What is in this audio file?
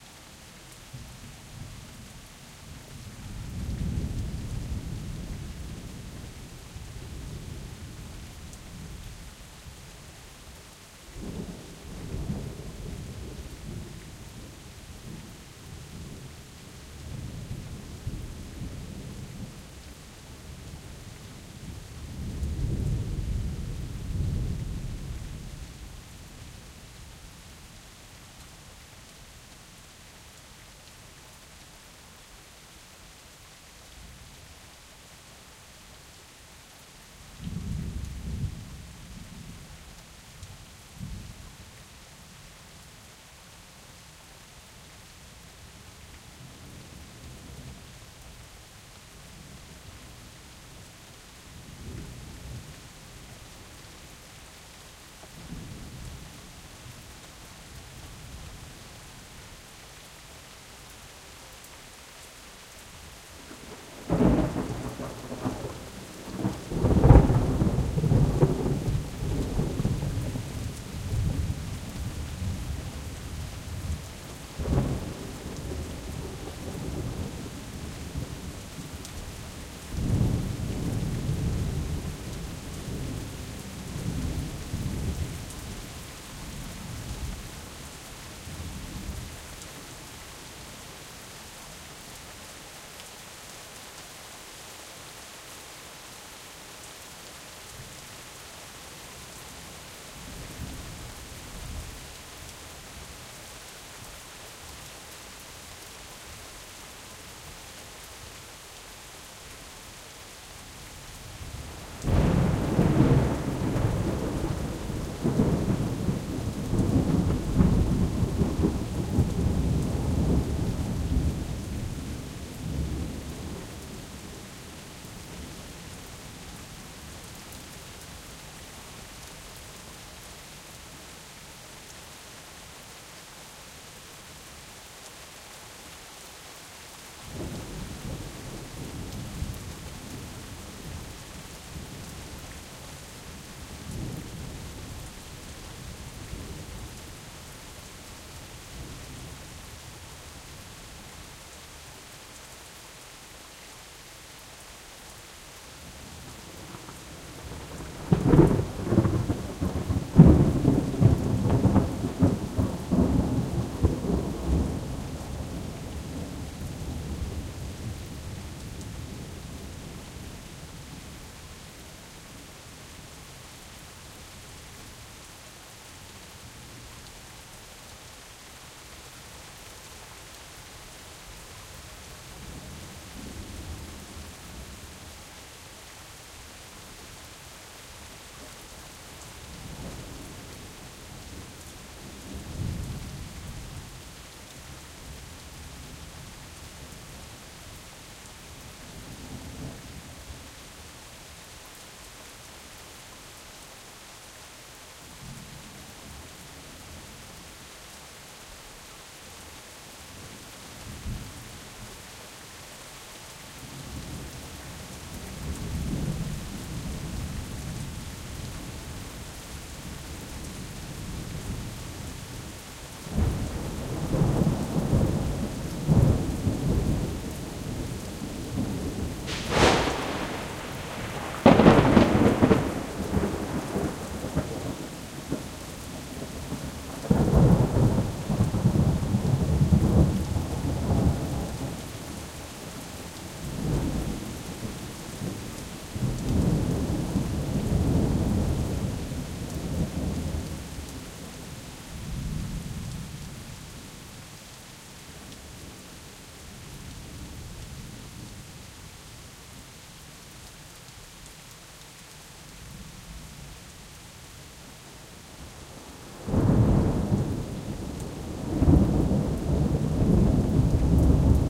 Thunder Storm Nonstop Thunder
A very energetic thunderstorm recorded outside. The thunder is almost nonstop.
2 Primo EM172 Mic Capsules -> Zoom H1 Recorder